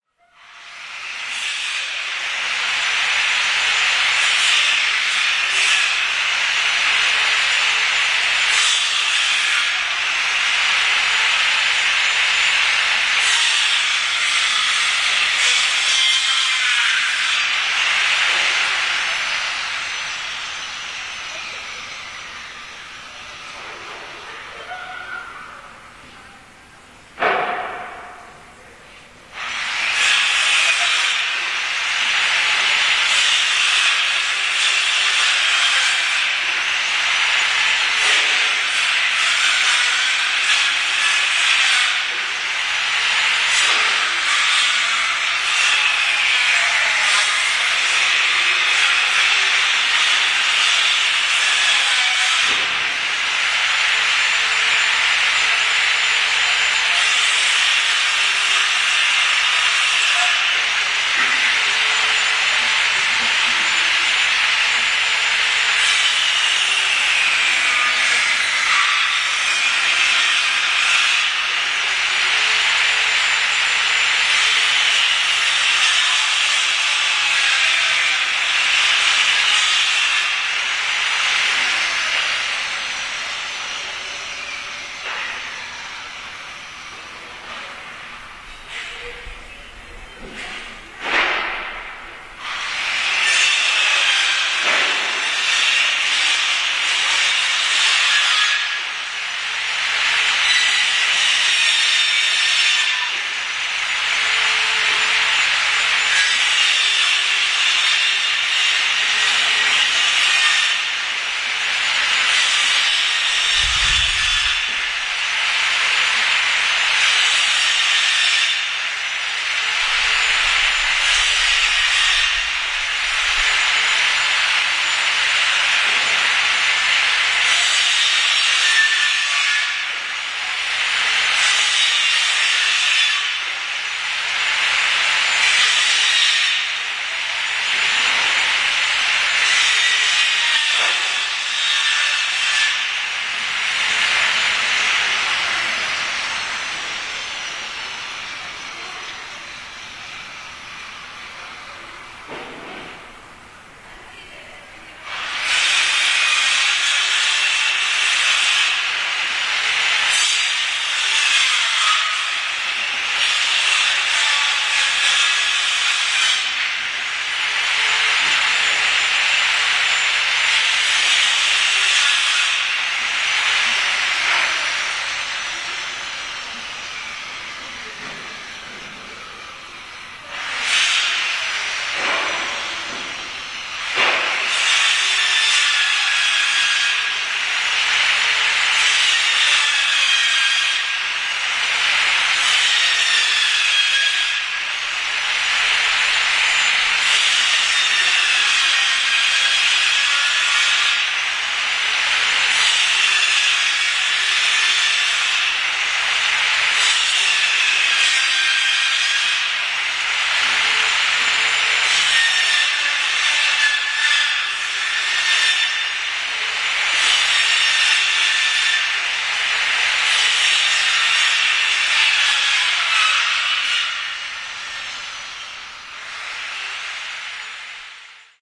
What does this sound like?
04.09.2010: about 15.40. one of my neighbours is cutting the wood inside his flat. the sound is so noisy because widows are open.now when I am uploading this sound the neighbour is still making this noise (17.00). Gorna Wilda street in Poznan.